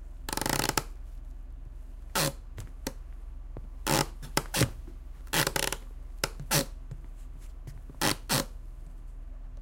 Floor Squeak Mid-Side Wooden Floor
Recorded with a Zoom H-6 and Mid-side capsules. A nice close-range wooden floor squeak.
Floor-squeak, creak, close, wooden, mid-side, squeaky, wood, squeak, floor